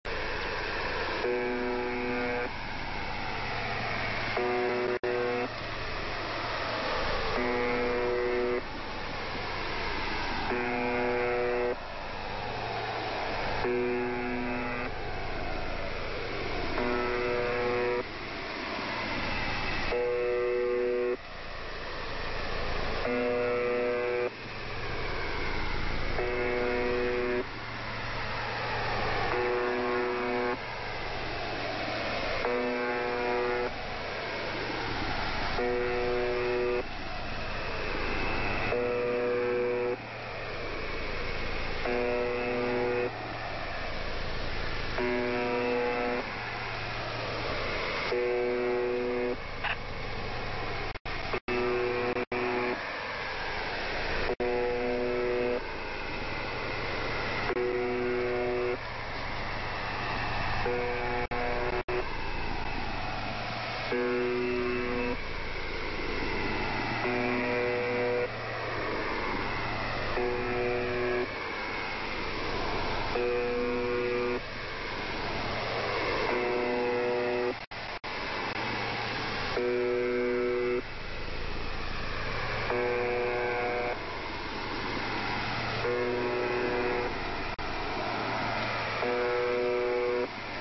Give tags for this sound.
bending; Broadcast; circuit; famous; FM; lo-fi; media; noise; radio; russian; Sound-Effects

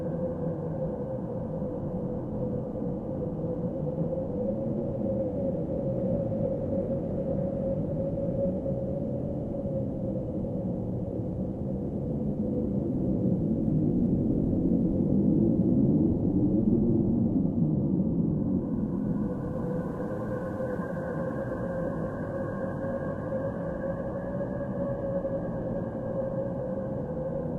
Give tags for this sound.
loop; relax; galaxy

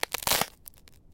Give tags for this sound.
outdoor; winter; crack; sheet; step; foot; ice

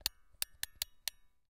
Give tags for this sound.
box; click; music; music-box; tick; winding; wind-up

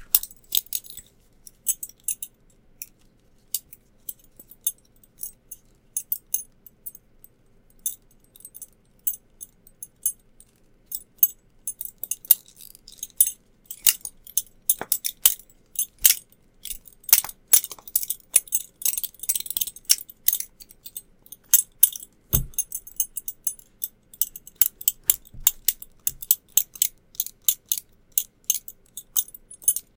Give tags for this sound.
belt; clang; clank; foley; handling; jangle; metal; metallic

